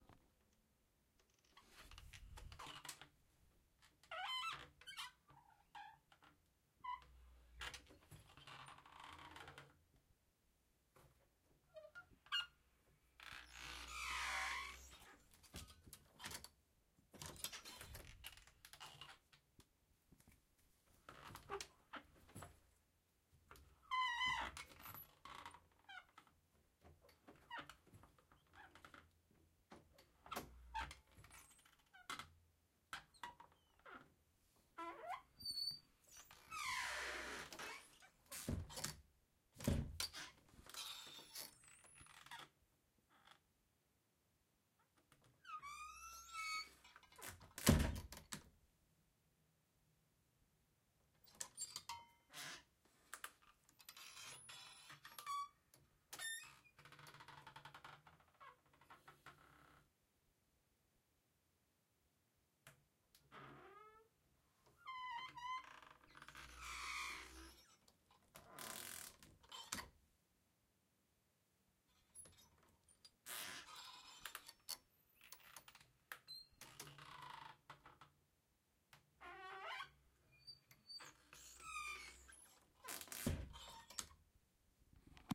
Creaking door
A creaky door being opened and closed several times. Distinctive sound of the handle and lock.
door, creak, opening-door, closing-door